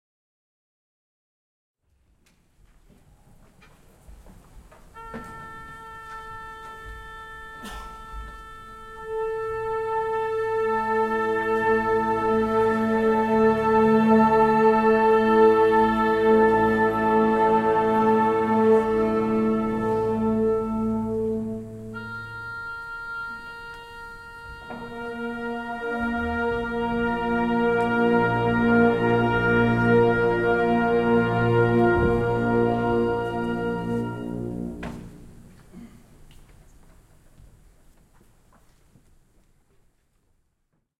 Oboe tone & Orchestra Tunning (Classical Music)
Orchestra tuning recorded during a Classical Music concert in a Concert Hall. Oboe tone + orchestra tuning.
Recorded with a Zoom H5n.